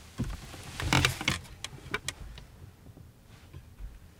chair sitting 6
By request.
Foley sounds of person sitting in a wooden and canvas folding chair. 6 of 8. You may catch some clothing noises if you boost the levels.
AKG condenser microphone M-Audio Delta AP
chair, creek, foley, sit, soundeffect, wood